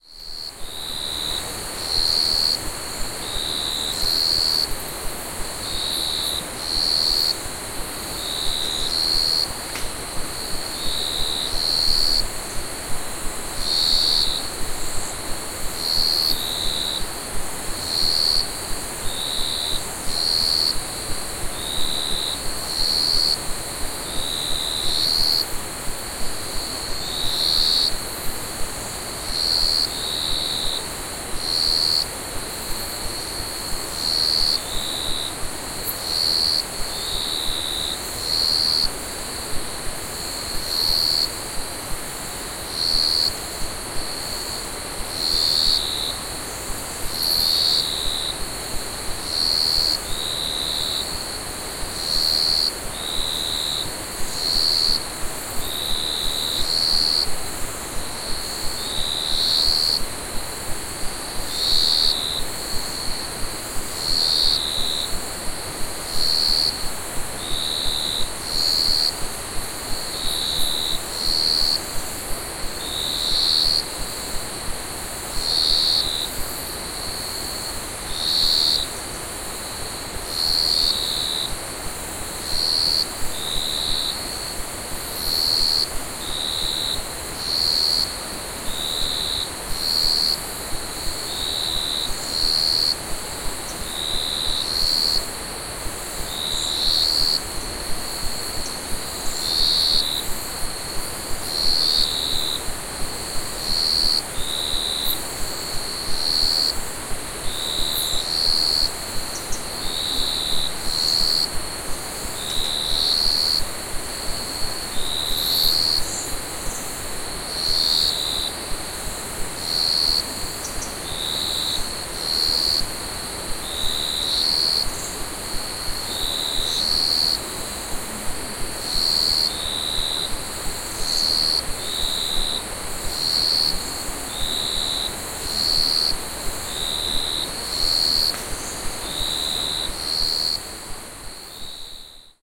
Crickets and river in China (Songpan)
china, cicada, cricket, crickets, emei, field-recording, insects, nature, night, river, shan, summer